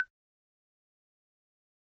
percussion sound in Dminor scale,...
itz my first try to contribute, hope itz alright :)
instrument, phone, percussion, africa